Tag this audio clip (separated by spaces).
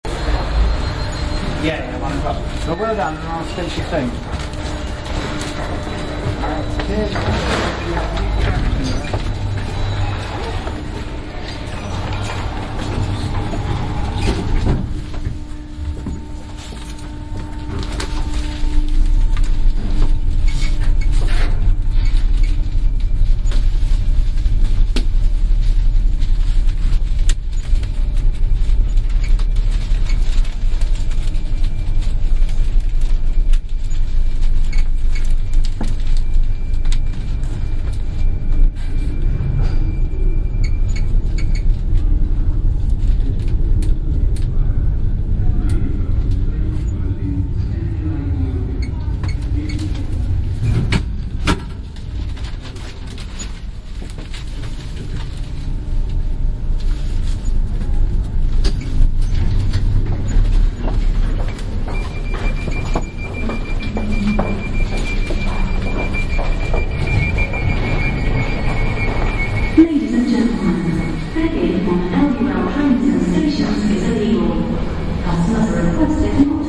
ambience field-recording